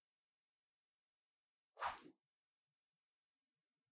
A sound meant to represent a knife or sword swing.